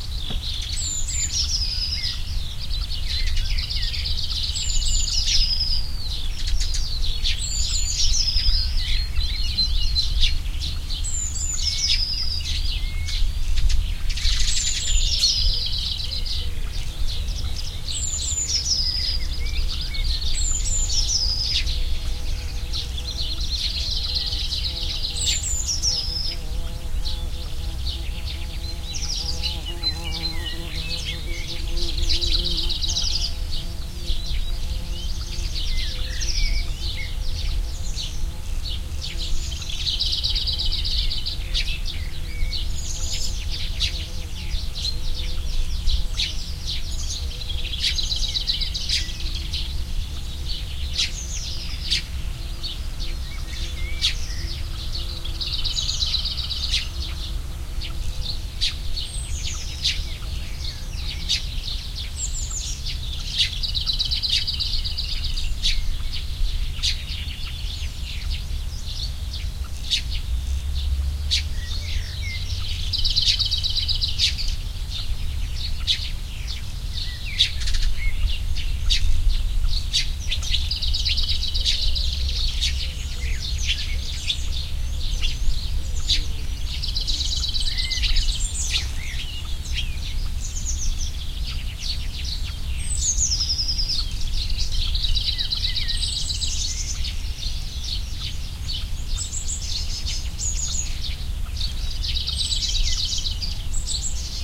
garden birds 02
Still in my garden, and the birds are singing. They really make you feel the summer breaking through a cold winter and spring.
This was recorded with a Sony HI-MD walkman MZ-NH1 minidisc recorder and a pair of binaural microphones.
garden, wind, binaural, birds